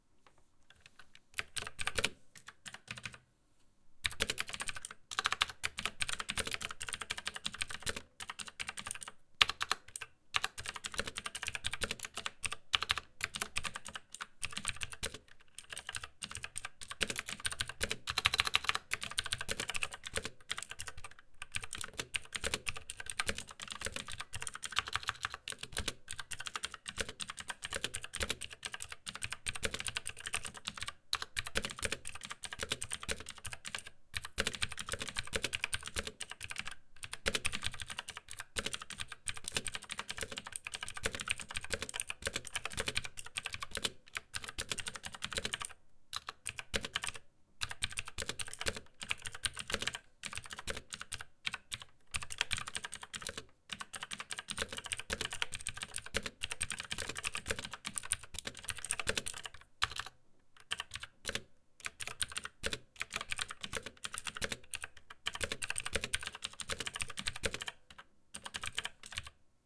Slower mechanical keyboard typing
Kailh Blue switches, comparable to MX Blue
Recorded with Tascam DR-07 stereo microphones, normalized and amplified in Audacity.
typewriter, Keyboard, sound, typing